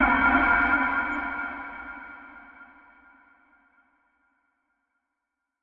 Alchemy Short FX 04
Sound generated in Alchemy synthesizer recorded to disk in Logic Pro X. Using Sample-based synthesis with two oscillators through its own four-bus effects engine.